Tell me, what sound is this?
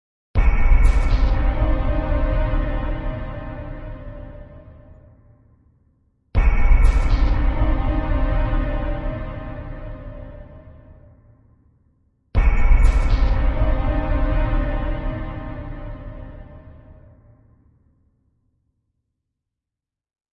ftz Lapaura01
Some Soundscapes to scary your little sister or maybe for movies or games.
Used:
Kontakt 4, Roland JV1080, Kore Player, Alchemy Player, BS Engine, UVI Workstation, few
Samples from MusicRadar and WorldTune
dramatic, Soundscape, halloween, fear, scary, dark, score